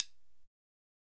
single stick hit
Just a single unprocessed hit. Zildjian drum sticks that came with Rock Band. Recorded through a Digitech RP 100. Probably unprocessed.
domain, drum, drum-sticks, public, stick, sticks, unprocessed